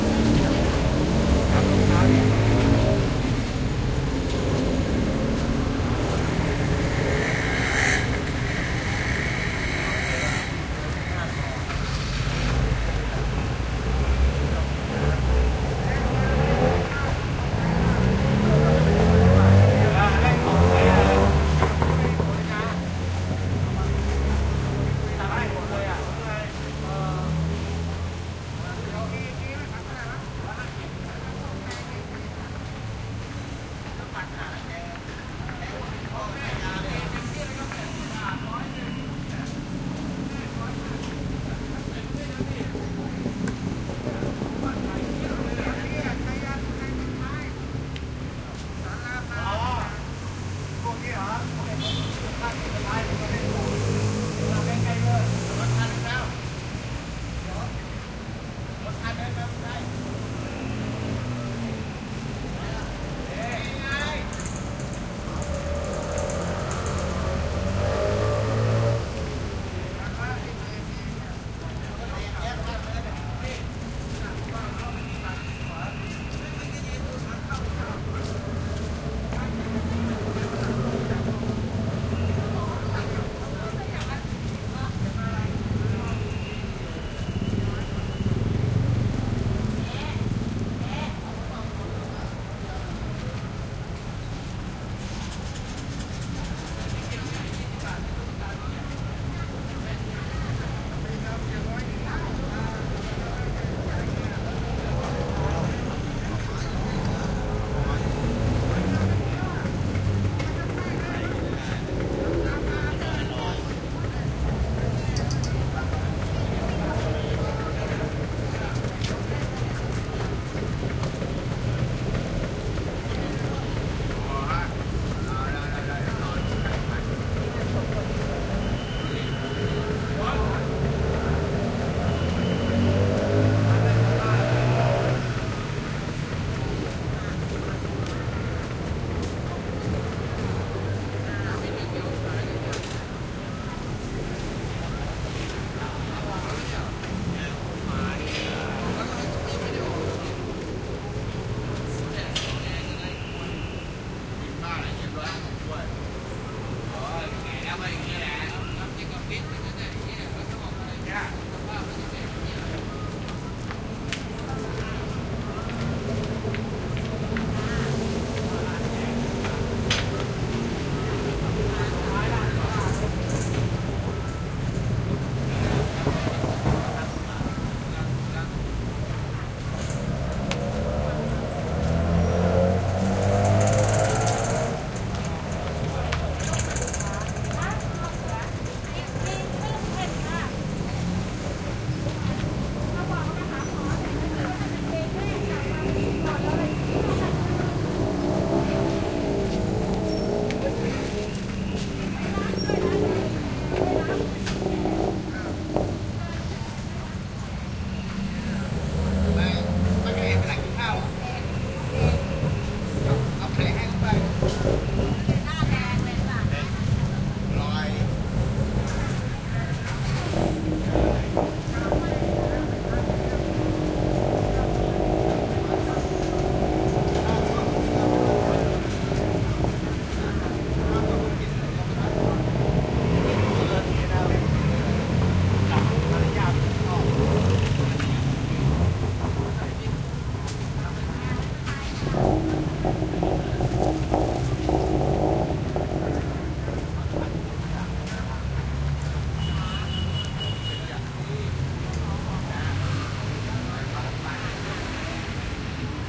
Thailand Bangkok side street activity voices +traffic med and light motorcycles calmer middle
side, traffic, activity, voices, Thailand, motorcycles, street, field-recording, Bangkok